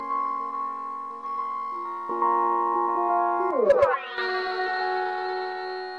Alesis Micron Stuff, The Hi Tones are Kewl.